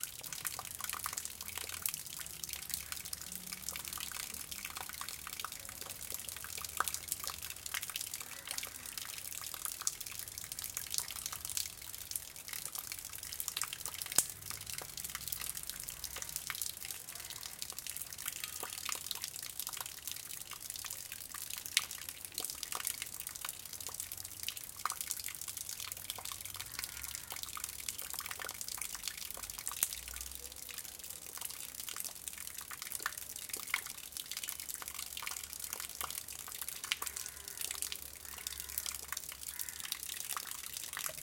After rain water dripping